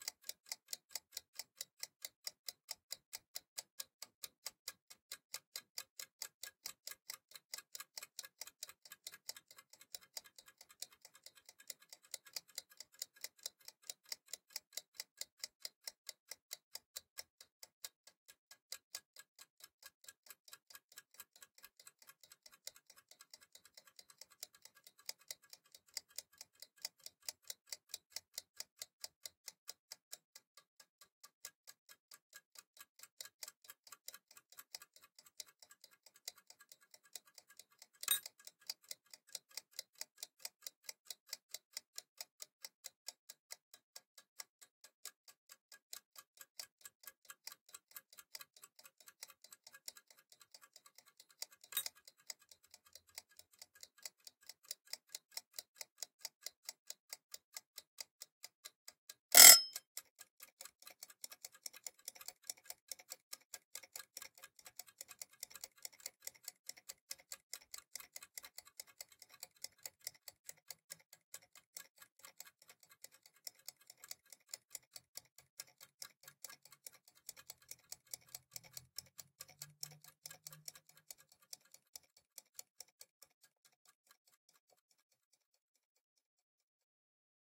delphis DOUBLE EGG TIMER
2 Egg Timers placed on a wooden board in the Bedroom. Recording with 2 S4 Studio Projects microphone. Recording program Steinberg Cubase 4.1 with the intern VST3 Plugins GATE, COMPRESSOR and LIMITER. Noise reduction with Steinberg WaveLab 6.10 and the plugins from Waves (X-Noise, C4)
clock, egg, ring, s4, tick